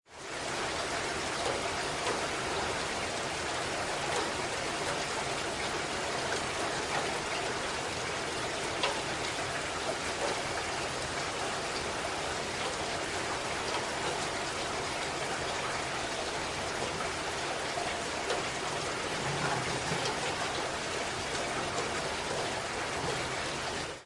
Ambience, Rain, Moderate, A
Raw audio of a moderate rain storm. This was recorded in Callahan, Florida.
An example of how you might credit is by putting this in the description/credits:
Ambiance, Ambience, Droplet, Moderate, Normal, Rain, Raining, Regular, Shower, Storm, Water